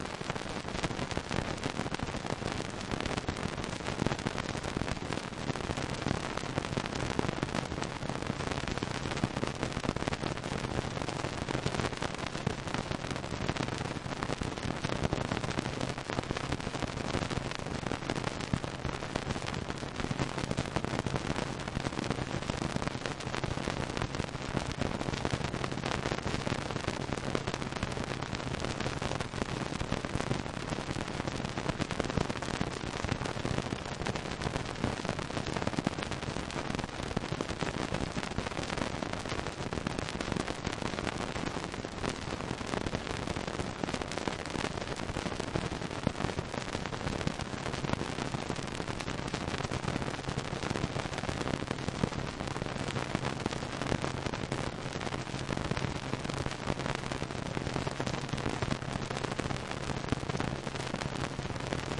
Stereo old vynil noise sample recorded with analog synthesizer.